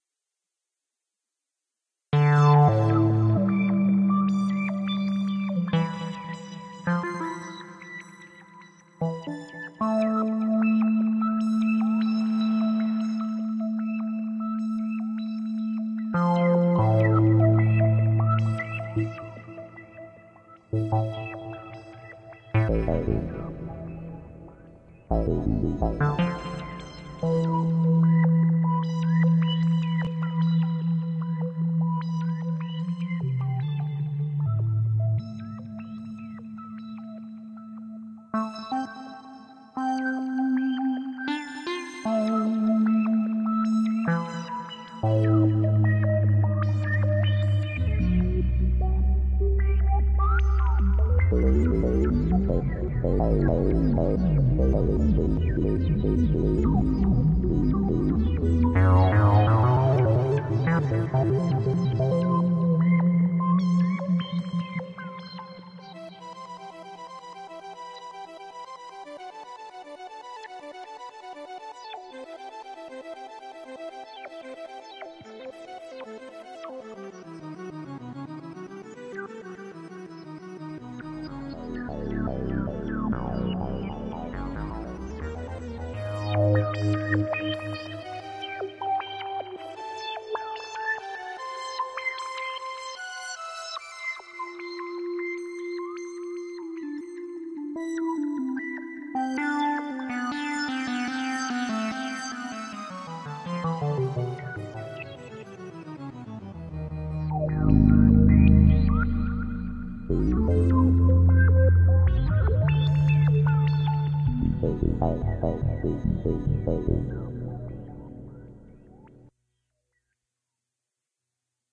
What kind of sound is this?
Soundscape Karmafied 02
Made with Korg Karma.
atmospheric, soundscape, synth